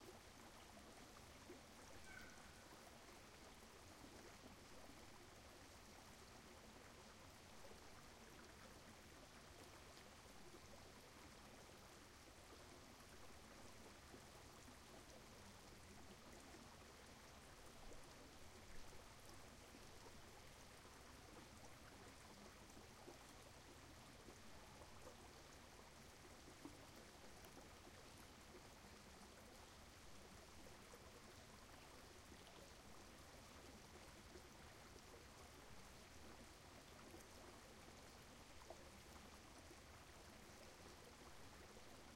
LR REAR STREAM WOODS QUEBEC SUMMER
brook, stream, water